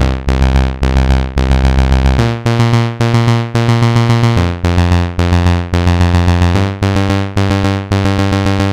Part of the Beta loopset, a set of complementary synth loops. It is in the key of C minor, following the chord progression Cm Bb Fm G7. It is four bars long at 110bpm. It is normalized.